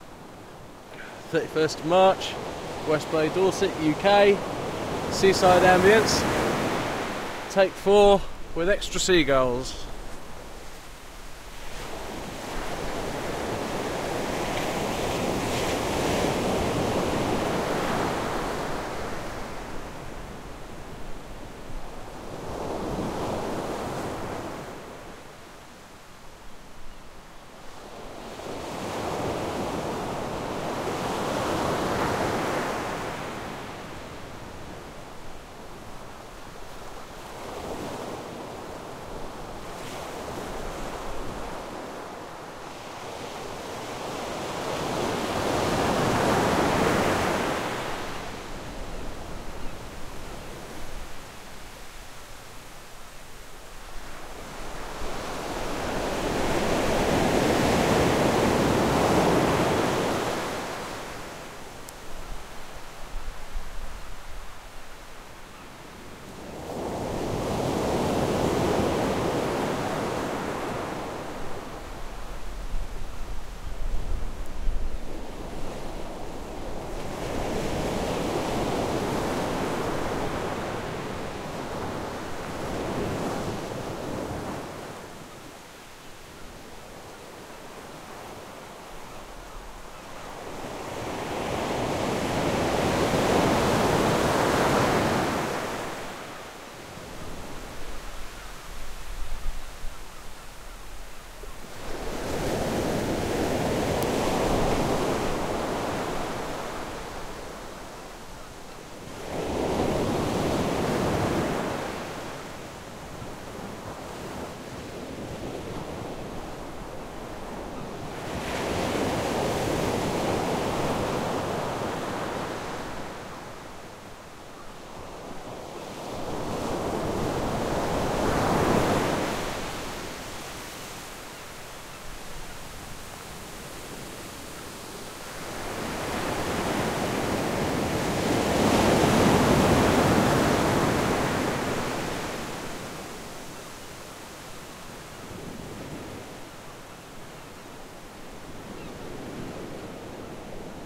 CFX-20130331-UK-DorsetSeaBeach04 seaguls
Sea Beach Ambience - now with added guls!